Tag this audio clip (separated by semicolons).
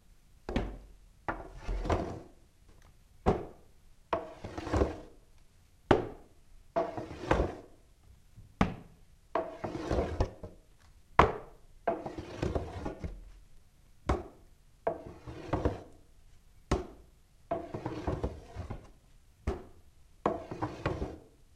john; long; silver; peg; leg